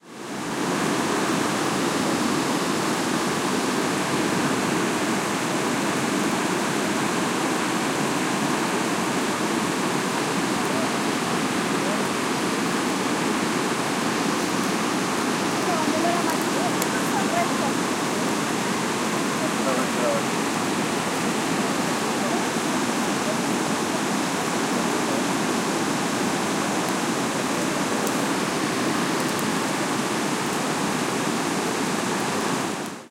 At medium distance, noise of a waterfall. Some talk can also be heard. PCM-M10 recorder, with internal mics. Recorded on the Brazilian side of the Iguazú waterfalls.
field-recording, river, stream, water, waterfall